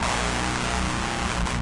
Alvarez electric guitar through DOD Death Metal pedal mixed to a robotic grind in Fruity Loops and produced in Audition. Enjoy!